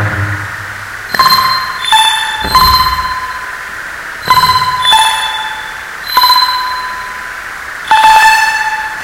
The Lamb
You are bidding on! No, these are my PSS 270 synth Cutter,
I finally busted that bitch out of storage again, it is processed through a Korg KAOSS Pad with the Reverb
Effect. So it is semi Noisy, Enjoy.! Good for some back drops, If you
mess with the glitches you can hit a key to sync the key to a drumloop,
and the drumloop becomes the keyloop, ryhthmic Isnt it.....I love everyone. ENJOY!
circuit, idm, ambient, glitch, faith, hellish, bent, noise, soundscape, synth